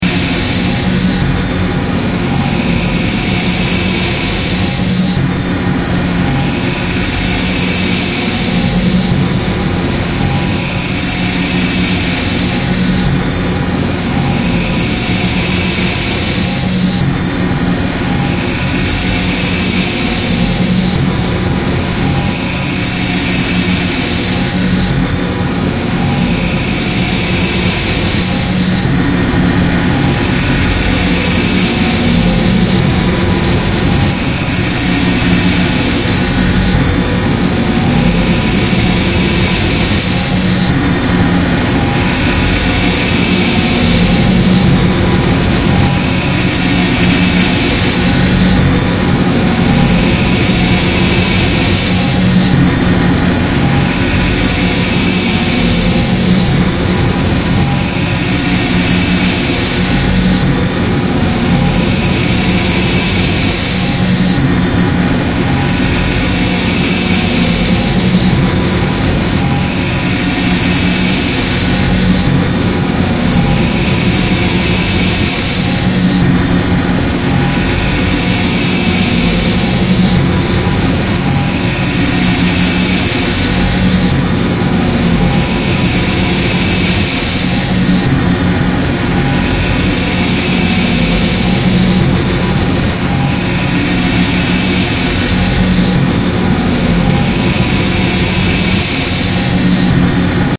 a sample of some of the loops paired with each other and pitch/speed adjusted